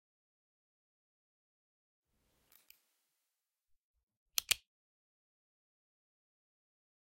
1. ballpoint clicking
ballpoint pen clicking
CZ, Czech, Panska